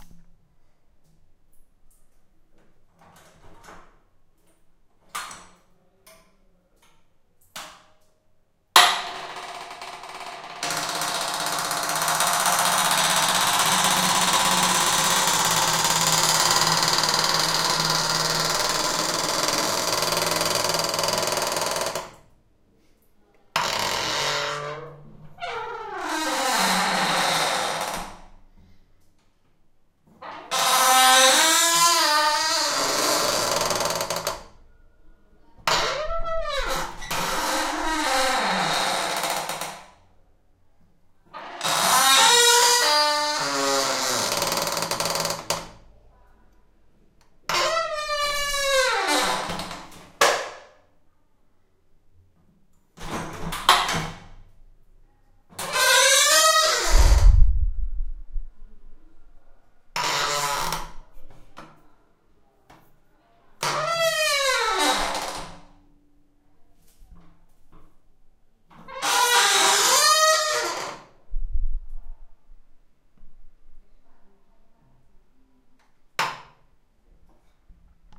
Heavy door squeak, recorded with Sony PCM-D50
door, squeak, wood